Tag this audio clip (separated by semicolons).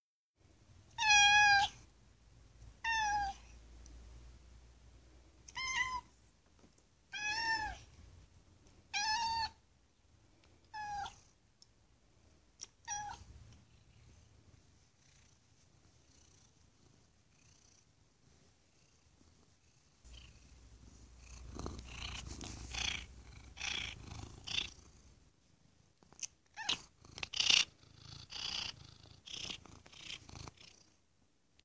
cat; miaow; miaows; purrs; purr